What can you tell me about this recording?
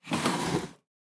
Whoosh Sound of Drawer Opening